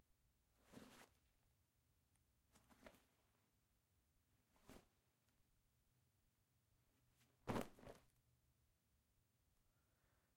Handling a leather bag, with a drop at the end.
Recorded in stereo on a Tascam DR-05. Raw audio with a 6db 5000hz low pass filter, and a 6db 100hz high pass filter.